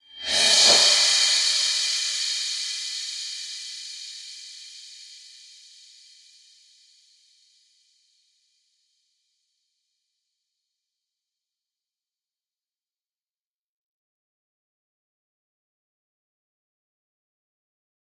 Rev Cymb 28 reverb
Reverse cymbals
Digital Zero
cymbal, metal, reverse, cymbals